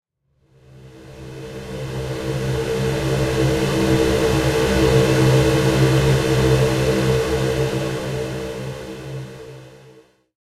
Industry Buzz
Noisy crunchy industrial pad sound.
bass, edison, pad, single-hit